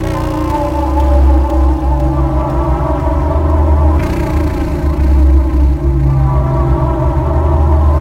Scary eerie halloween sound